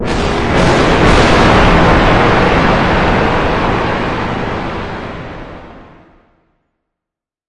Tri-Thunder Pile-Up 4 (70% Reverb)
Three sounds similar to thunder stacked upon each other with a heavy dosage of reverb. A loud, scary sound that fills the metaphorical room.